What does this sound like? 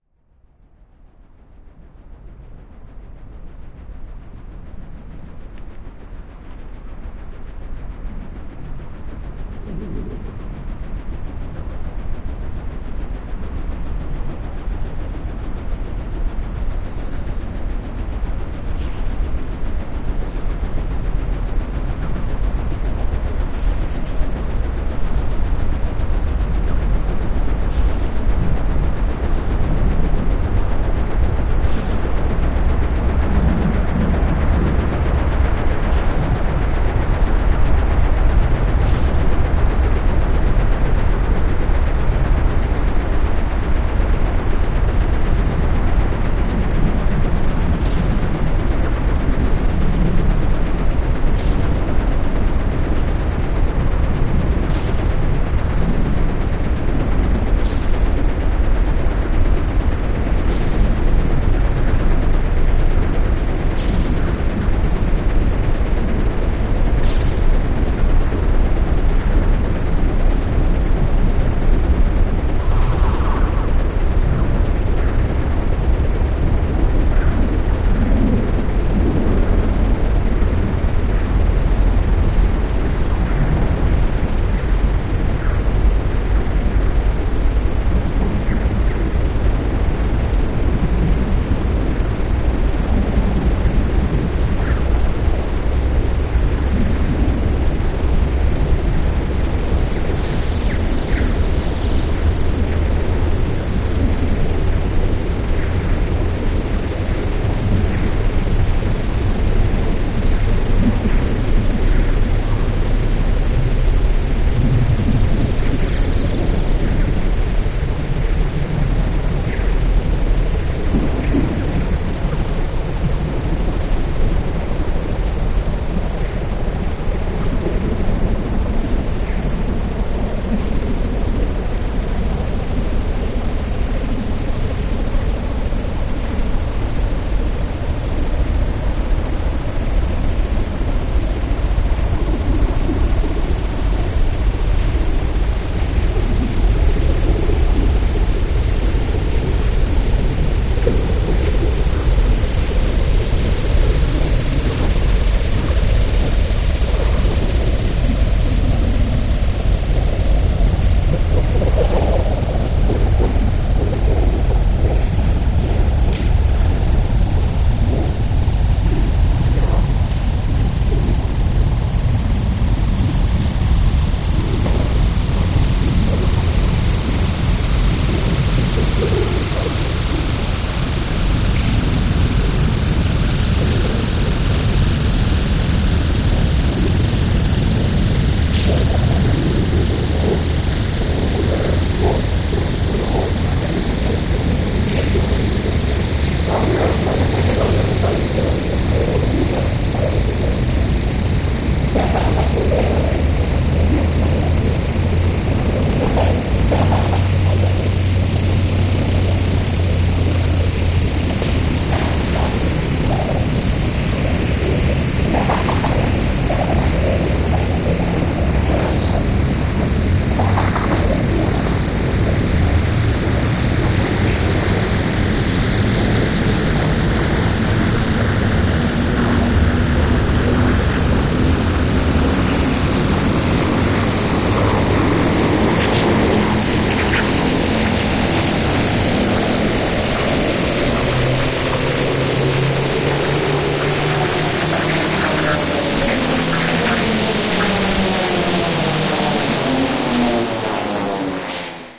loud ass park2
This is a heavily processed recording of a park in Tokyo at night. You can hear some kids hanging out talking and cars go by. Trippy stuff.